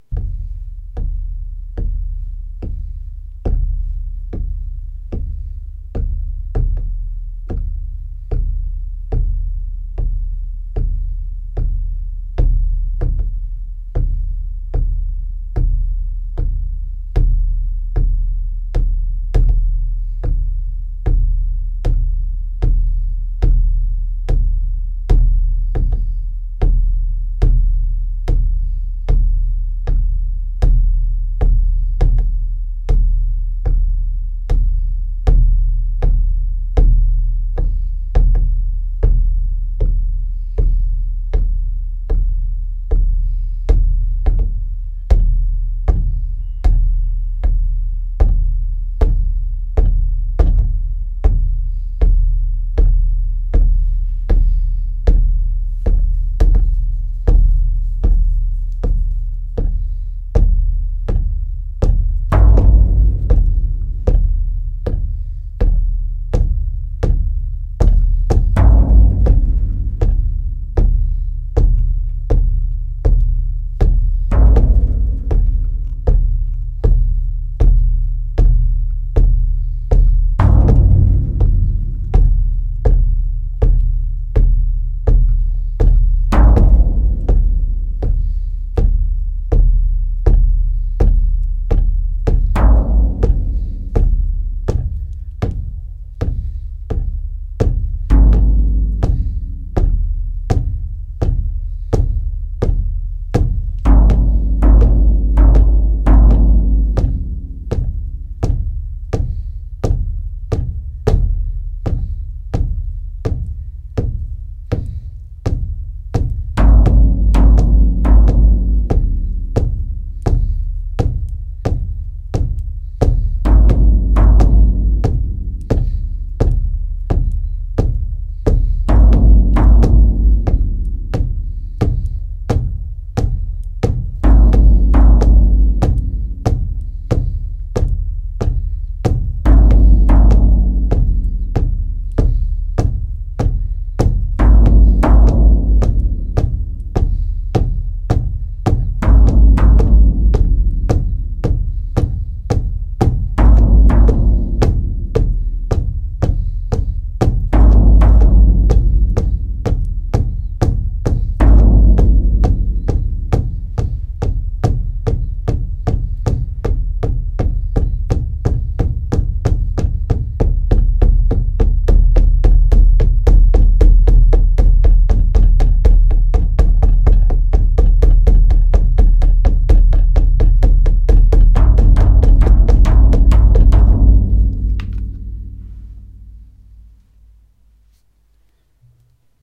YZ3drum1
When I looked for a drum to my simple studio, then I did not like any drum, not the cheap, nor the expensive. So I invented my own.
acoustic, basic, drums, instruments, Music, sounds